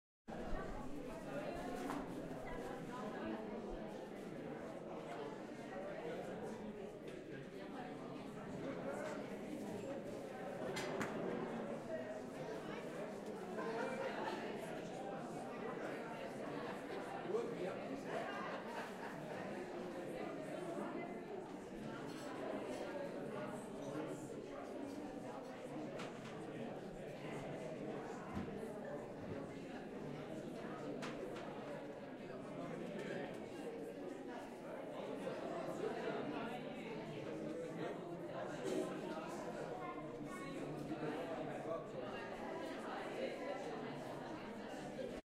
Hall Full of People - Ambience
A church hall full of people talking.
owi, people